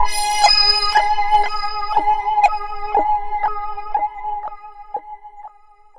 THE REAL VIRUS 14 - HEAVYPULZLEAD - G#4
A pulsating sound, heavily distorted also, suitable as lead sound. All done on my Virus TI. Sequencing done within Cubase 5, audio editing within Wavelab 6.
distorted, lead, multisample